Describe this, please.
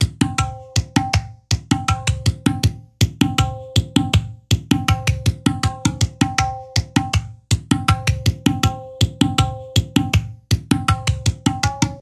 A drum loop from "Solace Within the Cold Steel", written by Eija Risen.